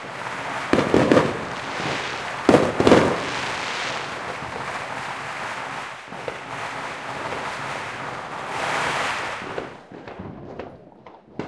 NYE Boom Firecrackers
explosion, firecrackers, fireworks, new, new-years-eve